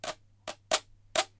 The sound of a Stylophone stylus being scraped across a plastic Stylophone speaker grill.